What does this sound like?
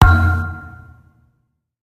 VSH-25-knuckle-thump-metal pipe-short
Metal foley performed with hands. Part of my ‘various hits’ pack - foley on concrete, metal pipes, and plastic surfaced objects in a 10 story stairwell. Recorded on iPhone. Added fades, EQ’s and compression for easy integration.
crack, fist, hand, hit, hits, human, kick, knuckle, metal, metal-pipe, metallic, metalpipe, percussion, pop, ring, ringing, slam, slap, smack, thump